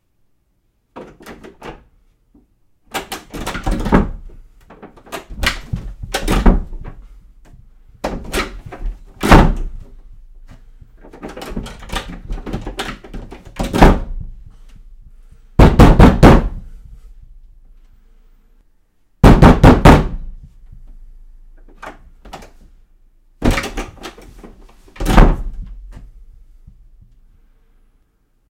break through blocked door
This is the sound of someone trying to break through an ordinary wooden door (house interior) that's being blocked by another person from the other side. You can hear the doorknob being tested, the person outside trying to open the door but having it slammed shut again, banging loudly on the door, then throwing their body against it. This is recorded from the perspective of the person blocking the door (i.e. the noise is outside, the mic is inside).
Recorded with a Blue Yeti mic in Audacity.